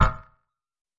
STAB 030 mastered 16 bit
A short percussive sound. Created with Metaphysical Function from Native
Instruments. Further edited using Cubase SX and mastered using Wavelab.
short, electronic